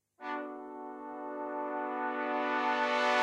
keyboard brass
Just a held chord on the brass setting of the keyboard.
brass, horns, keyboard